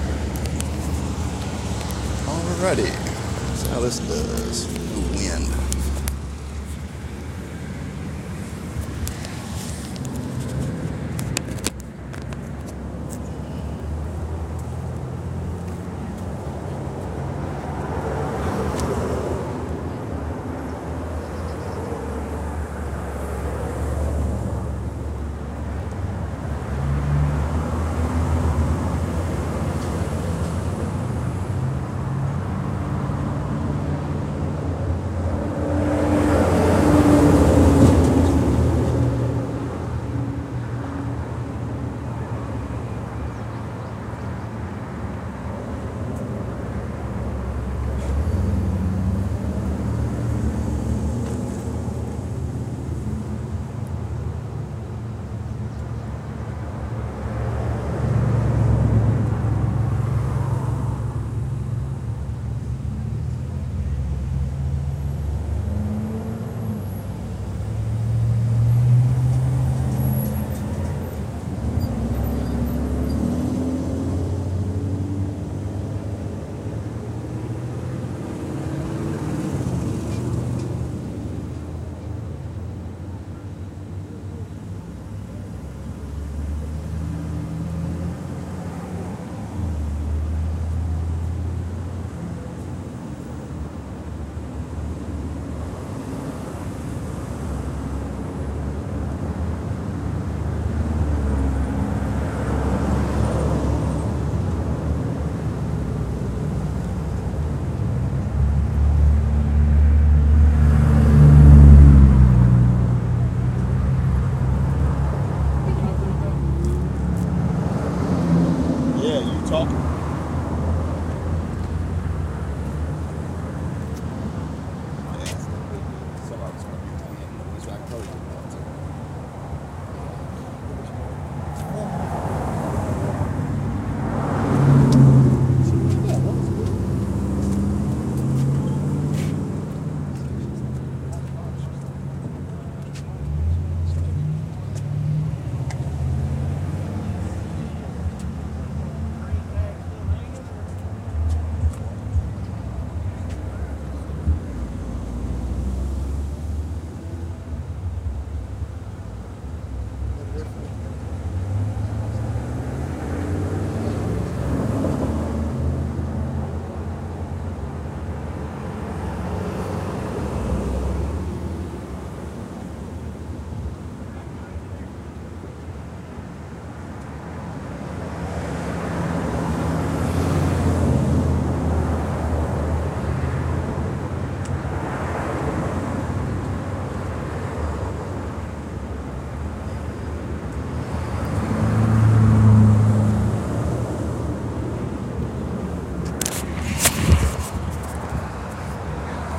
ROAD NOISE
asphalt, car-pass, street-noise, highway, street-sfx, road, cars-pass, highway-sound, street-corner, sidwalk